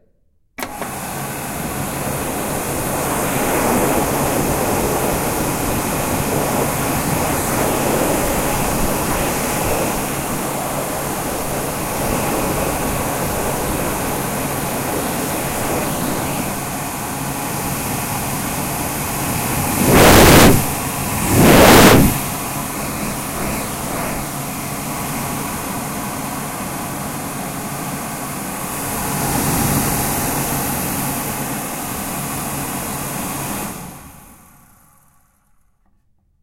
Sound of a hand dryer.